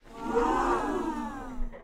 Crowd Wow Sound Effect 1
small crowd saying wow with delight
Recorded with Zingyou BM-800